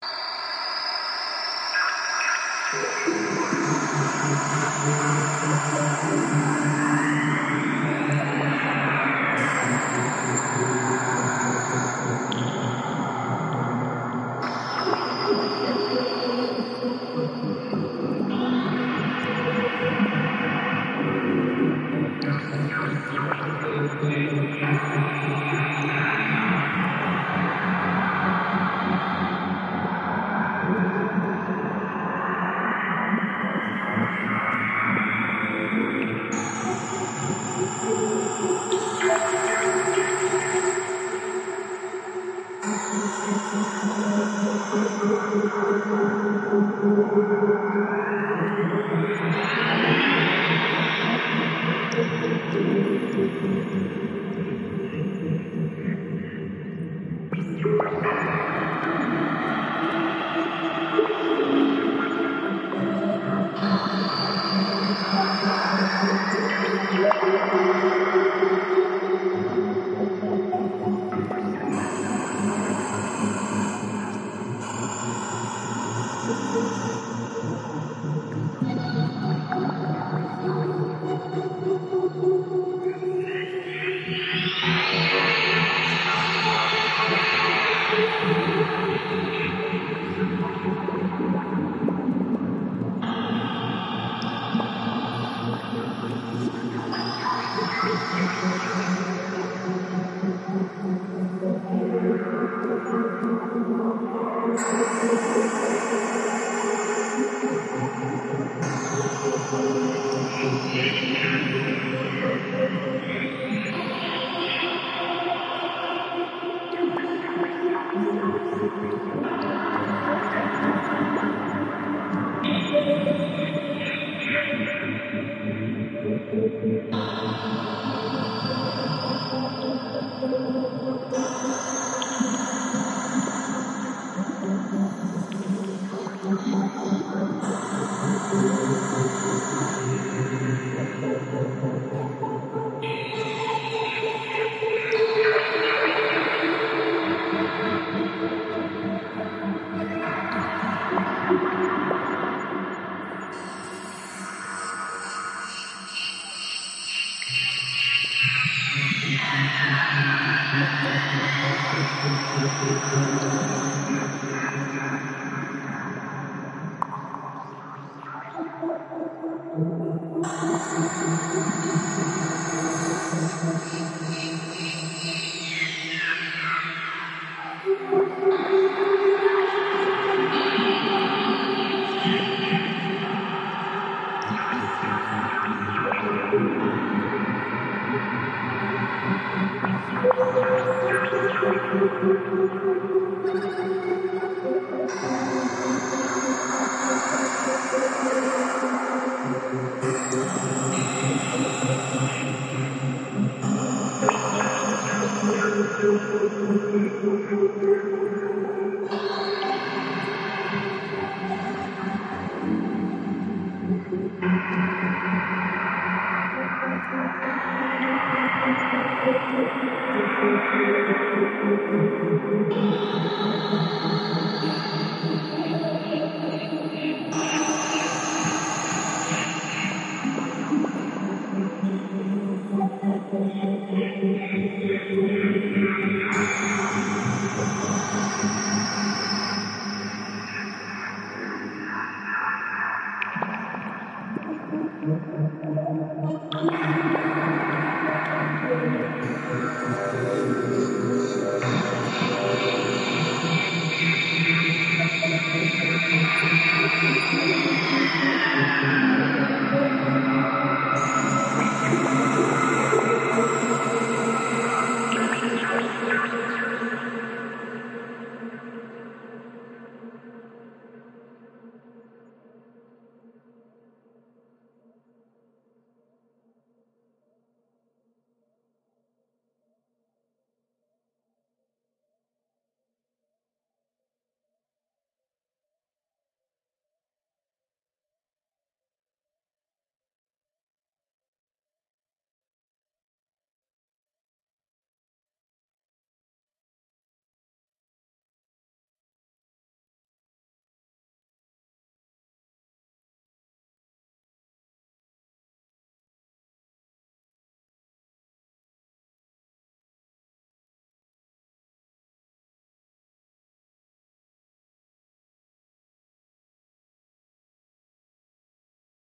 CWD LT space drone 12 landscapes
ambience, ambient, atmosphere, cosmos, dark, deep, drone, epic, fx, melancholic, pad, science-fiction, sci-fi, sfx, soundscape, space